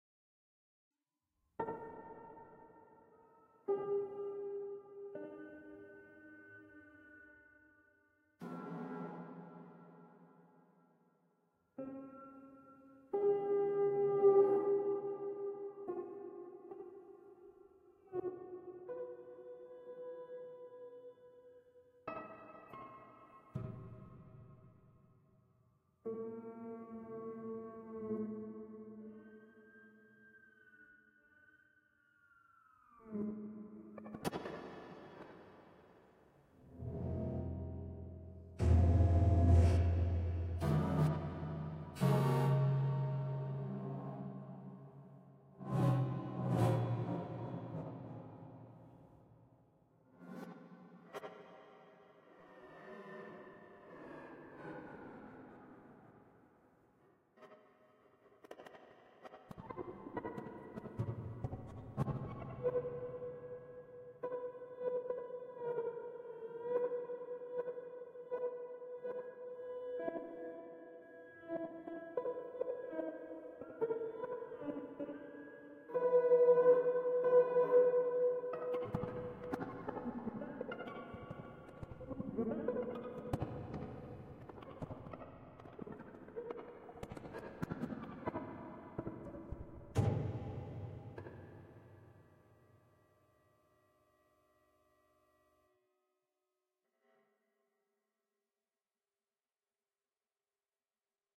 A wacom-tablet live improvisation of a spectral-analysis of single piano notes/noises (as found in my noisepiano-samplepack)
electronic, atmo, kyma, piano, tablet, wacom, live, noise, horndt, blips, blip, marcus, spectral-analysis, improvisation, atmosphere, sound, random